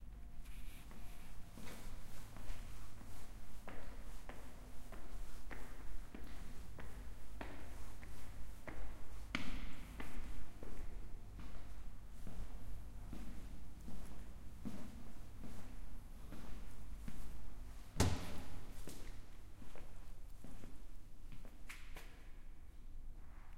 steps in a large stairwell
Person moving upstairs in a large stairwell
large, stairwell, steps, upstairs